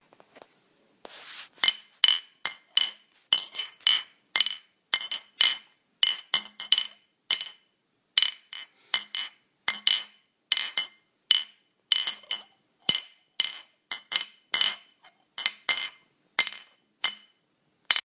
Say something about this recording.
green empty beer bottles
Empty green beer bottles
beer, bottles, Carlsberg, glasses